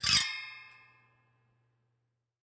The sound of an egg slice cutter. I've 'played' an arpeggio on the strings of it. Recorded with an AKG C2000b.
kitchen, egg, slice, string, cutter